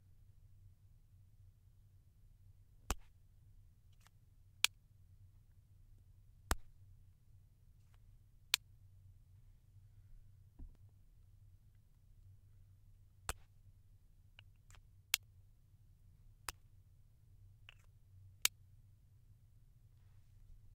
MarkerOpening/Closing
I am opening and closing a Prismacolor Premier marker. I recorded in a sound booth called the Learning Audio Booth.